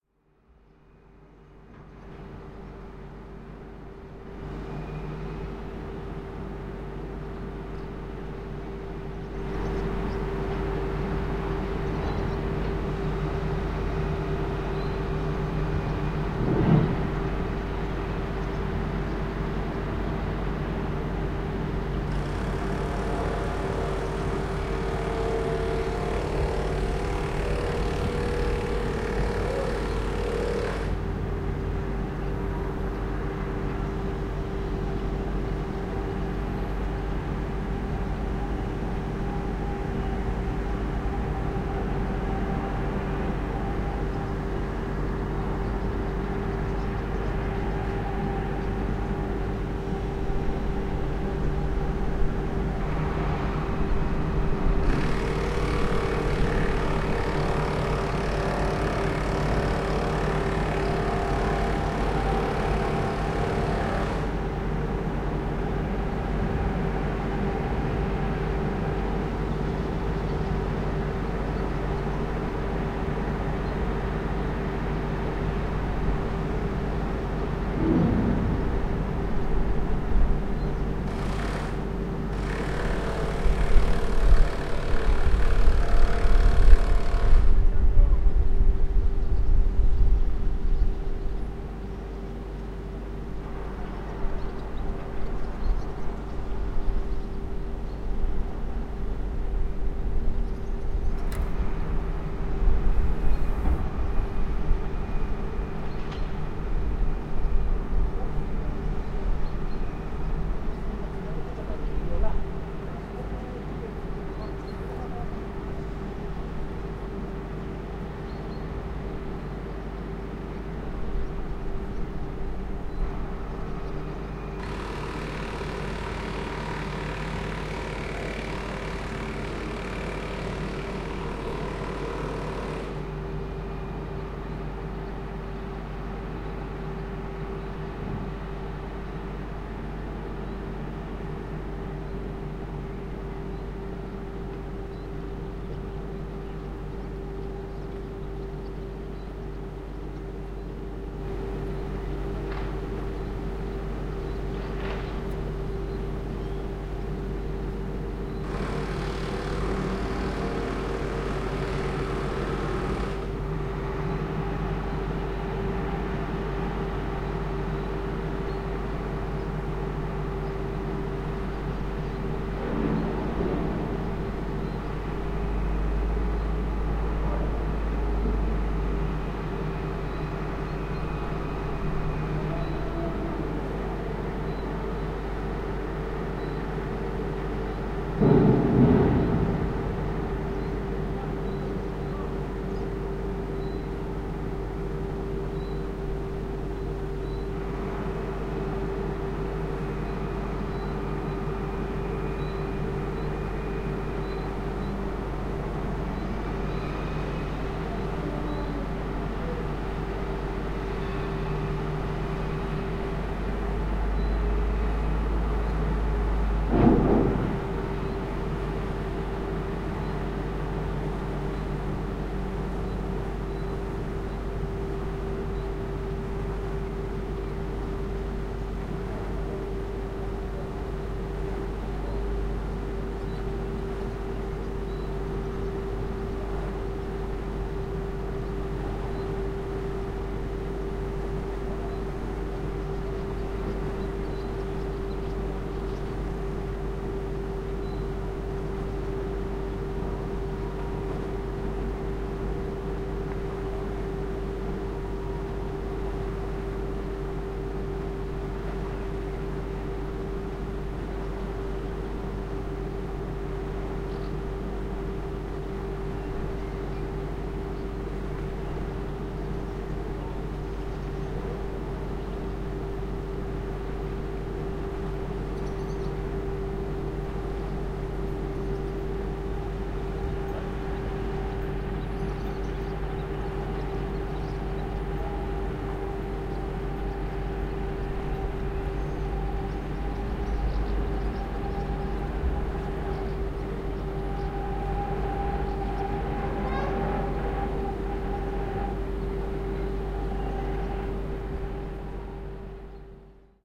boat engine
The sound of a ferry boat going to Mount Athos.